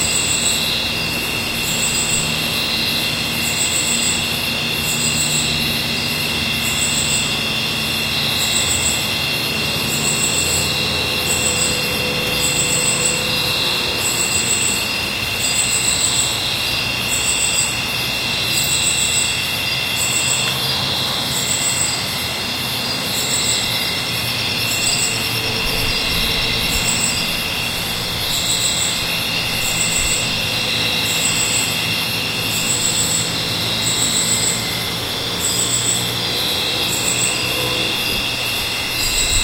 Costa Rica 1 Insects
ambiance, costa-rica, field-recording, insects, summer